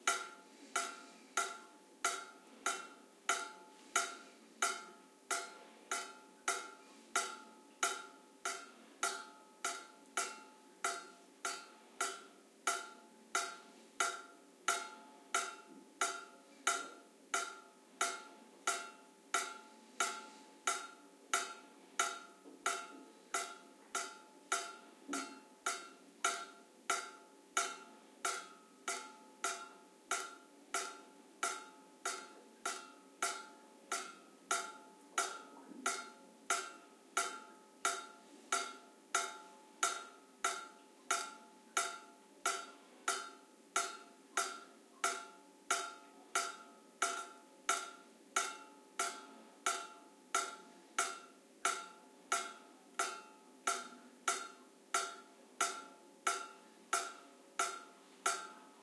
dripping sound. AT BP4025, Shure FP24 preamp, PCM M10 recorder